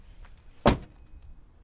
Door Slam
Car door being slammed shut
Slam, Shut, Door, Close, Car